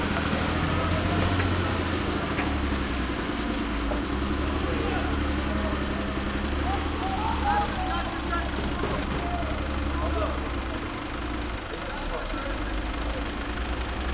garbage dump light work and nearby machines5 +frontloader move slowly and worker shouts Gaza 2016
garbage, frontloader, dump, machines, light